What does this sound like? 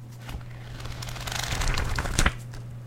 Turning many pages
Turning all the pages of a book in a few seconds.
all, book, every, page, pages, turn